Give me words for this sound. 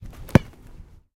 soccer kick 02
kicking a ball